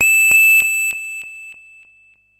Q harsh bleep plus click delay at 100 bpm variation 1 - E5
This is a harsh bleep/synth sound with an added click with a delay on it at 100 bpm. The sound is on the key in the name of the file. It is part of the "Q multi 001: harsh bleep plus click delay at 100 bpm" sample pack which contains in total four variations with each 16 keys sampled of this sound. The variations were created using various filter en envelope settings on my Waldorf Q Rack. If you can crossfade samples in you favourite sampler, then these variations can be used for several velocity layers. Only normalization was applied after recording.
100bpm, electronic, multi-sample, synth, waldorf